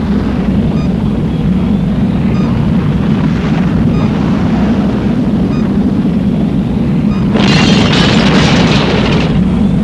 Sound of a terminating rocket stage during flight